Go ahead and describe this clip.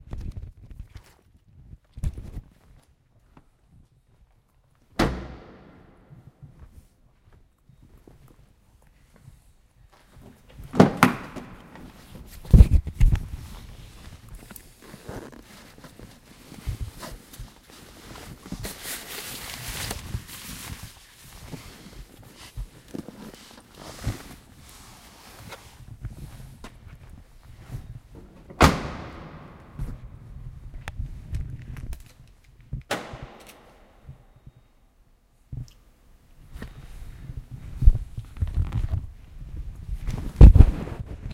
Pulling keys out of the ignition and slamming the door of a car in a big reverberant city multi-storey car park.